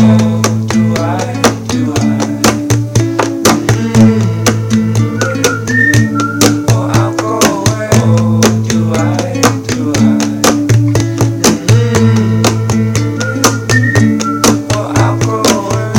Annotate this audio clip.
GO AWAY Mixdown
A collection of samples/loops intended for personal and commercial music production. All compositions where written and performed by Chris S. Bacon on Home Sick Recordings. Take things, shake things, make things.
guitar, drums, Folk, whistle, loops, percussion, sounds, vocal-loops, piano, beat, voice, harmony, acoustic-guitar, indie, loop, original-music, synth, rock, free, drum-beat, acapella, bass, samples, Indie-folk, looping, melody